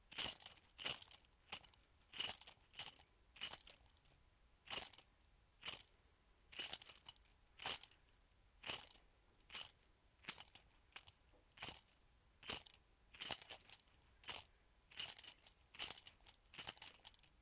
Keys rustling sounds.